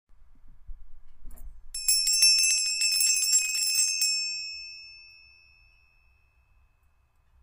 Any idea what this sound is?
Bell, ringing, ring
Bell, ring, ringing